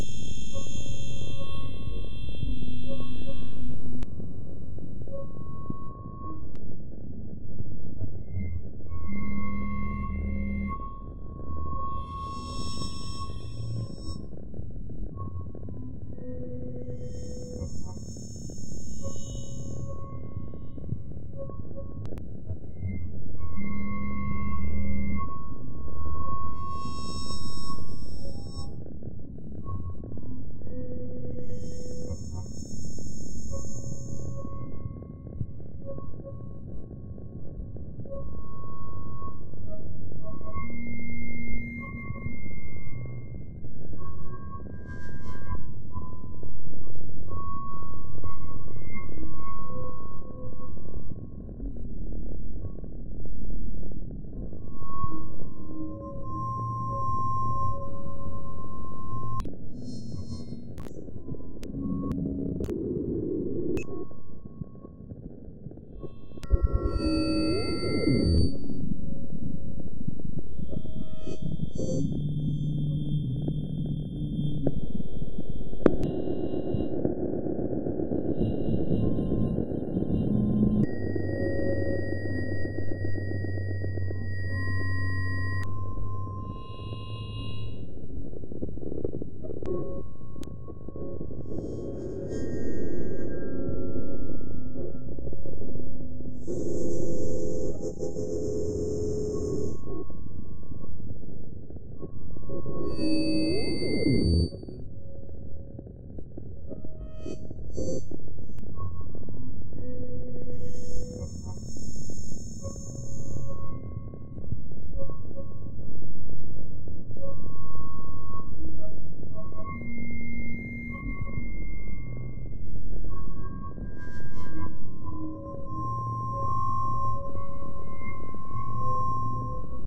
A couple of variations that began with simply feeding back the various modules in Gleetchlab upon themselves. There is no external output but there is some Reaktor effects for good measure.
noise, gleetchlab